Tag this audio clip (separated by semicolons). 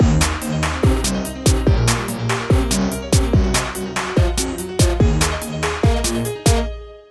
flstudio; loop; techno